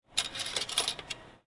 Picking Coins Out of Return Slot
Foley recording of Dawn picking coins out of the return slot of a money changer. Recorded in a subway station in Gwangju, South Korea.
coin, sound-effect, money, field-recording, foley